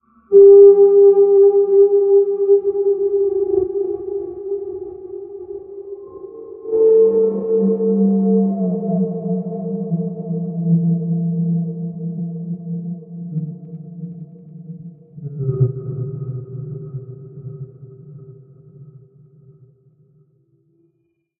Samurai Jugular - 06
A samurai at your jugular! Weird sound effects I made that you can have, too.